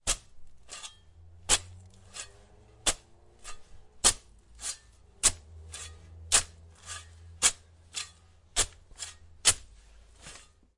Shovel in dirt
Recorded in stereo with a Zoom H6. Stabbing a shovel into a bucket of dirt and withdrawing it several times.
dirt, foley, field-recording, audiodrama, AudioDramaHub, digging, shovel